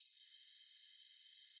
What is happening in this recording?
Synth Strings through home-made combfilter (32 Reason PEQ-2 two band parametric EQs in series). Samples originally made with Reason & Logic softsynths. 37 samples, in minor 3rds, looped in Redmatica Keymap's Penrose loop algorithm, and squeezed into 16 mb!
Synth, Strings, Multisample, Combfilter